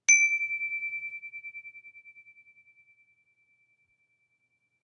Richcraft - chime D 20181219
I struck a single rod from one of my wind chimes with a wood stick.